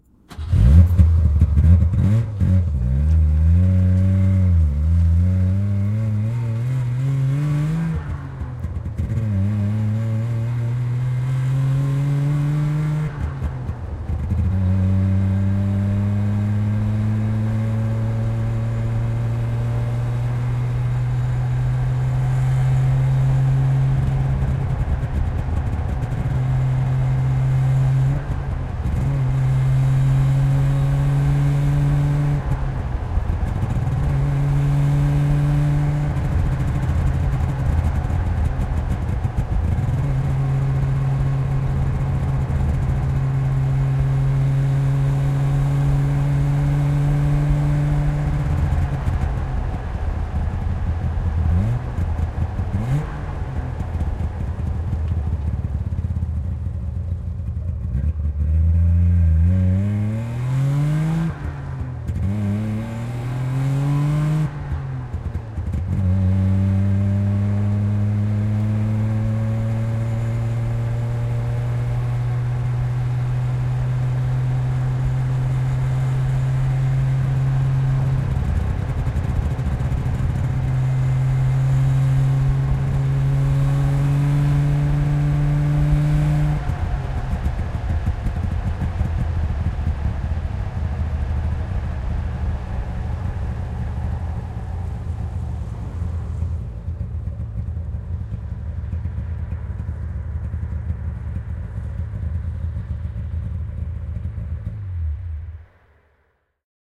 Henkilöauto, ajoa asfaltilla / A car, variable driving on asphalt, Saab 96, a 1966 model
Saab 96, vm 1966. Käynnistys ja vaihtelevan vauhdikasta ajoa mukana asfaltilla, pysähdys, moottori sammuu. Äänitetty ulkoa konepellin päältä. (Saab 96, 2-tahti, 45 hv, 850 cm3).
Äänitetty / Rec: DAT (mic on bonnet)
Paikka/Place: Suomi / Finland / Järvenpää
Aika/Date: 17.10.1995
Auto, Autoilu, Autot, Cars, Field-Recording, Finland, Finnish-Broadcasting-Company, Motoring, Soundfx, Suomi, Tehosteet, Yle, Yleisradio